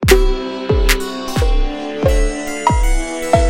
a schort song
slow, dj, hiphop, downbeat, producer, downtempo, club, drum-loop, hip, hardcore, phat, hip-hop, drum, filter, chillout